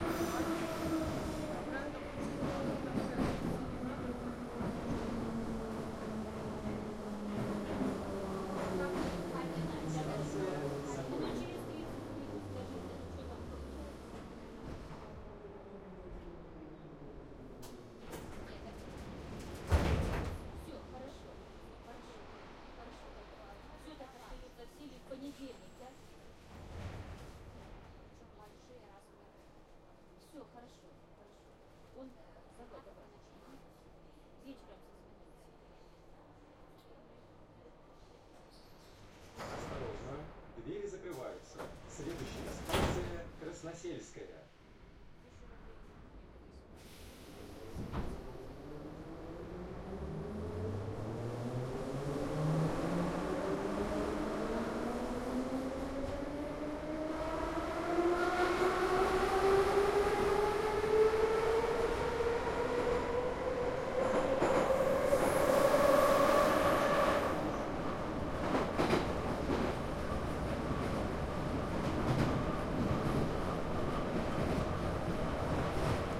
ambience, metro, wagon, train, interior, city, Moscow
Moscow metro wagon ambience.
Recorded via Tascam DR-100MkII.
ambience, metro, subway, train, wagon